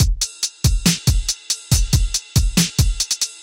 4 Beat 02 Triphop
4 Beat Drum loop for Triphop/Hiphop4 Beat Drum loop for Triphop/Hiphop